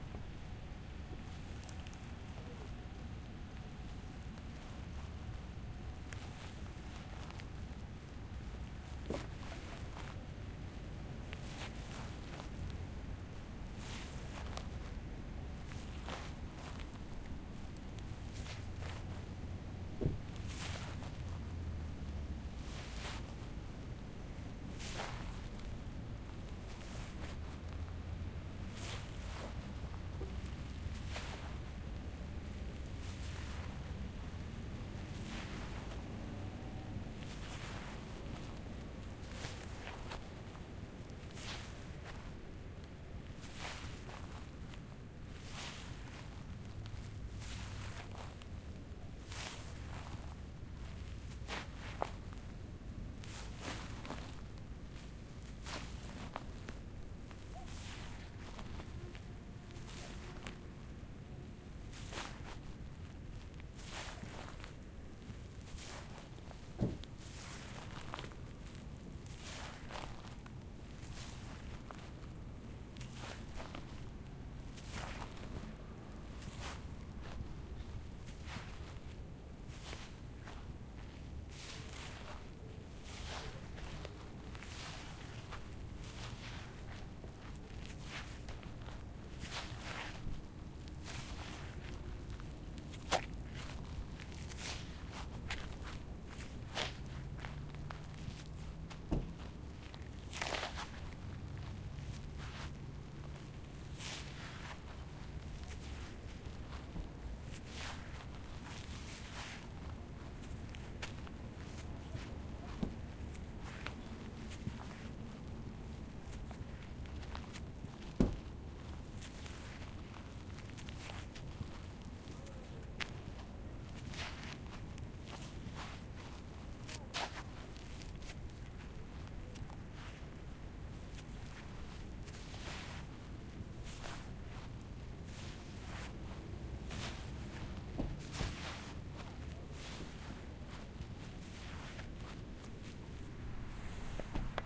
grass noises
walking on grass
ambience, field-recording, grass